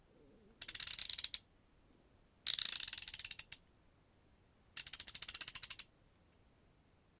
Rachet clicking as it turns
Recorded with zoom h2n